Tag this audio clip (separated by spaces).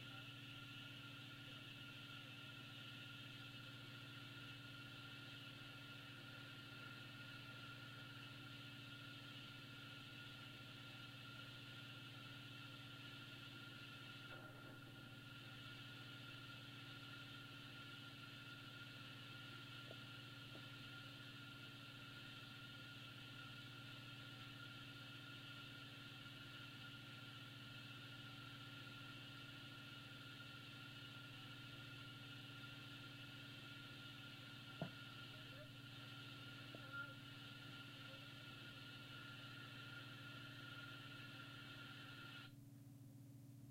flashes hail storm thunder weather